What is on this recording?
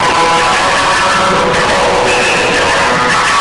Battering my guitar, semi-live.